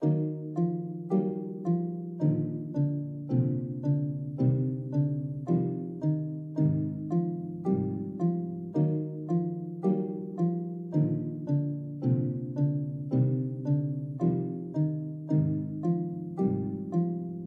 SFX for the game "In search of the fallen star". This is the song that plays in the cave section.
ambience, atmosphere, midi, miesterious, music